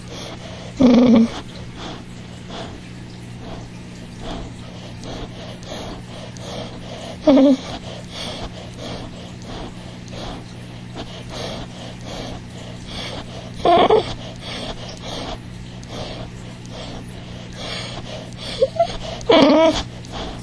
This is a digital field recording of my Olde Victorian Bulldogge crying and fussing. She is a big baby.